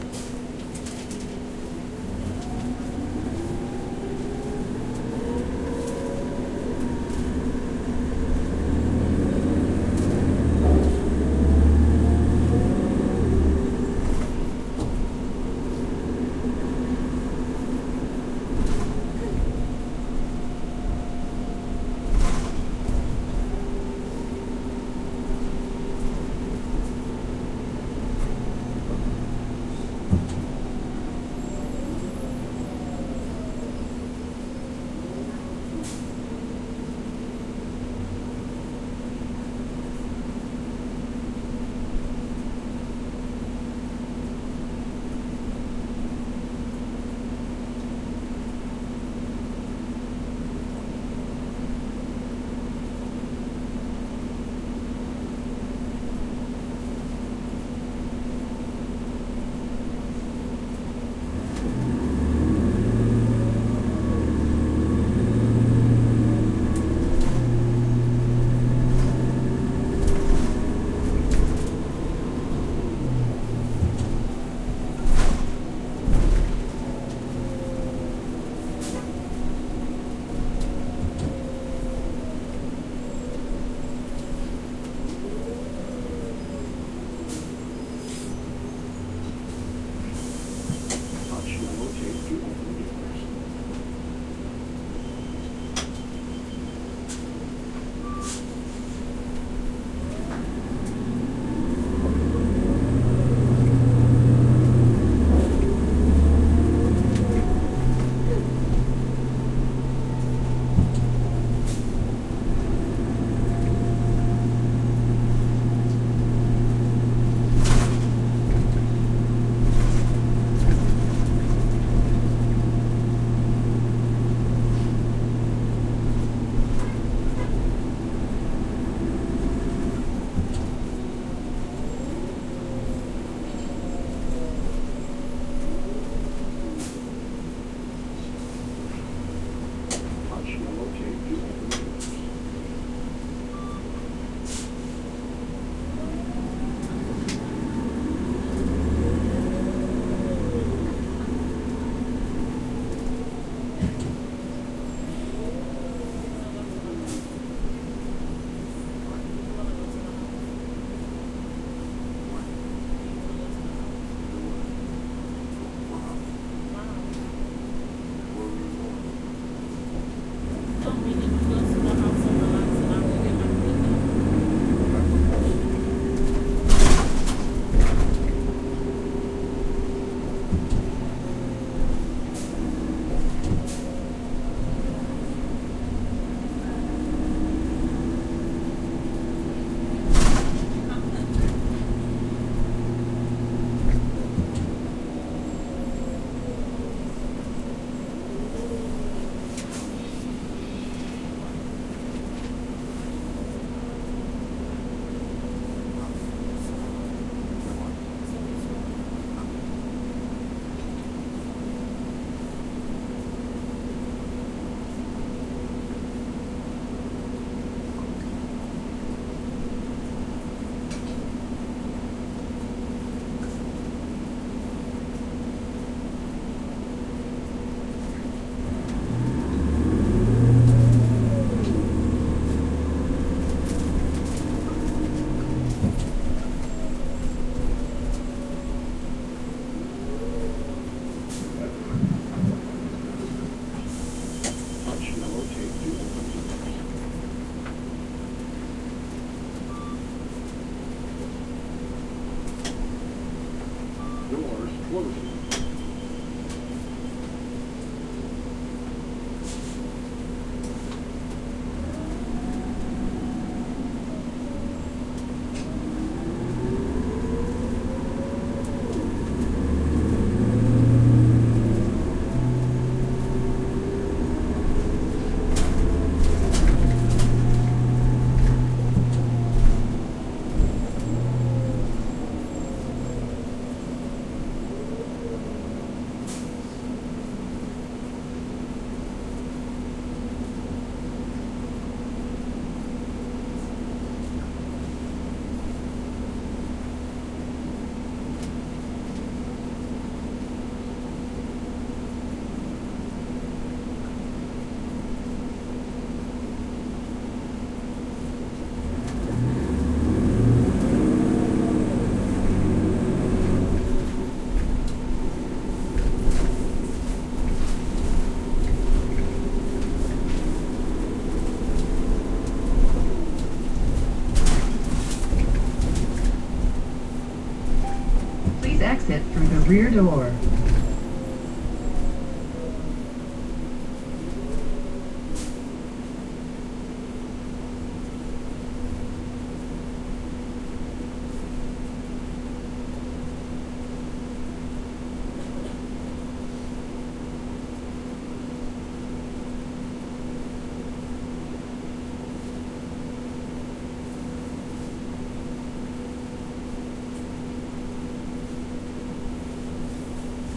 bus ride brooklyn bus annoucements stops passengers
Recording of a bus ride taken inside a bus in Brooklyn. NY, USA. Various announcements and the sound of passengers can be heard. Recorded with an H2N zoom recorder.